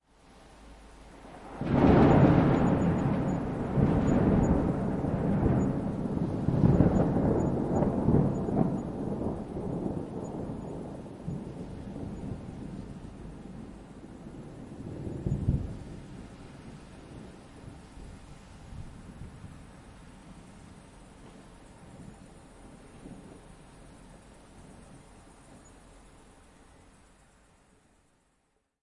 Thunderstorm Tallinn tascam DR 44W 1
Recorded in Tallinn(Estonia) by Tascam DR 44W
Summer Thunderstorms and Rain
Lightning, Loud, Rain, Storm, Tascam, Thunder, Thunderstorm, Thunderstorms, Weather